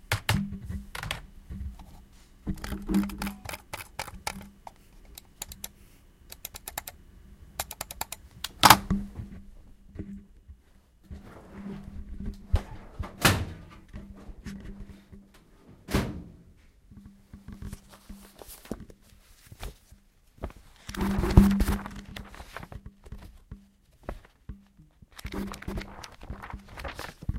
session 3 LBFR Doriane & Damien [2]
Here are the recordings after a hunting sounds made in all the school. Trying to find the source of the sound, the place where it was recorded...
france, labinquenais, rennes, sonicsnaps